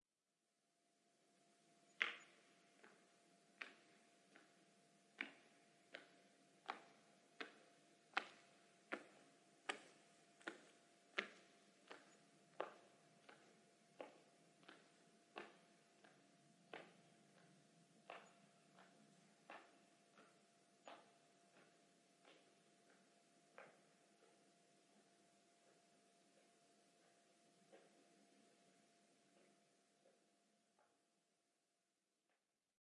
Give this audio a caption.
Bunyi no.15 langkah kaki ver 4
foot steps walking
foot, step, steps, walk, walking